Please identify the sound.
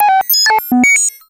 Computer sounds accepting, deleting messages, granting access, denying access, thinking, refusing and more. Named from blip 1 to blip 40.
blip, computer, sound